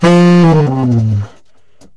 Tenor fall f2
The format is ready to use in sampletank but obviously can be imported to other samplers. The collection includes multiple articulations for a realistic performance.
woodwind, jazz, vst, saxophone, tenor-sax, sax, sampled-instruments